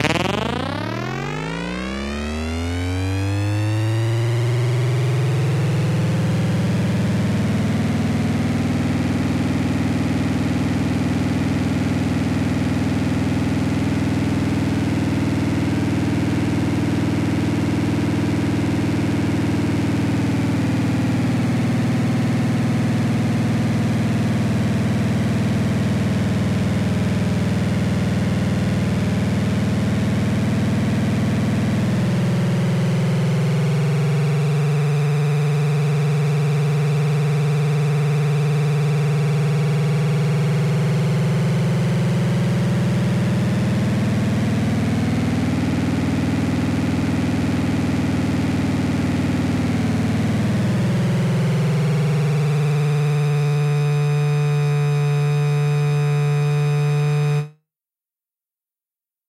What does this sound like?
Space craft or ufo sound, could be used for game sounds.